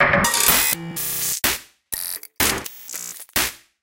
SnaredArtifacts 125bpm04 LoopCache AbstractPercussion
Abstract Percussion Loops made from field recorded found sounds
Abstract, Loops, Percussion